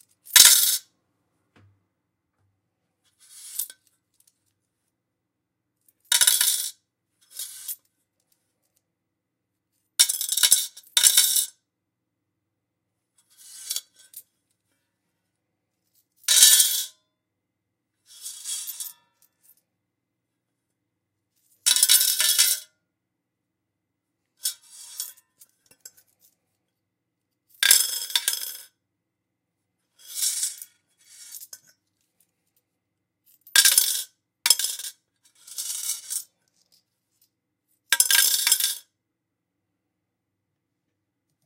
Jingeling coins, mono recording